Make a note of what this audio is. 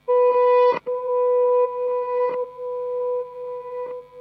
a small note which was extensive delay (tape and reverse) and feedback. used a marshall vintage 8080 combo with a shure sm58 miking it